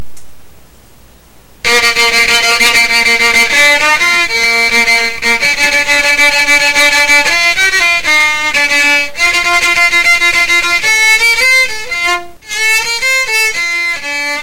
I recorded this on Audacity, it's me playing my violin. Thought somebody might like it.